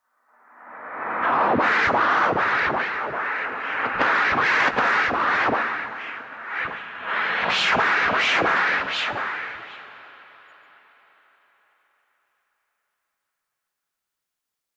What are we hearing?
reverb reverse scratch
This is a recording of a DJ scratching that was processed with reverb and reversed with ProTools.
DJ, reverb, reverse, scratch, scratching, turntable, vinyl